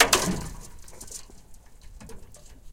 Somewhere in the fields in belgium we found a big container with a layer of ice. We broke the ice and recorded the cracking sounds. This is one of a pack of isolated crack sounds, very percussive in nature.
crack, ice, break, percussive, crunchy